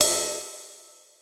record of a 22" sabian solar ride with Beyerdynamics "mce 530"